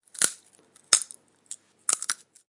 sfx comer pipas
sunflower seeds eat pipas girasol
pipas, snack, girasol, seeds, eat, sunflower